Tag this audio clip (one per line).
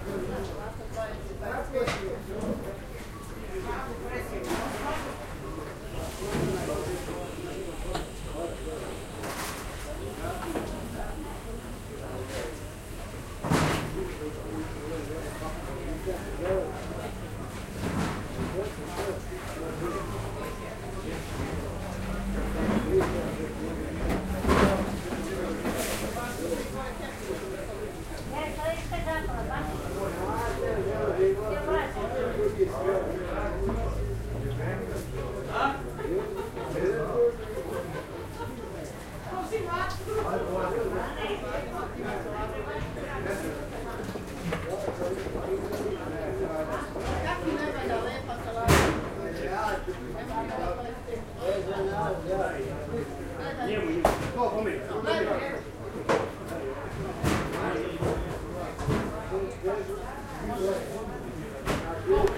talking
people
chatting
market
buying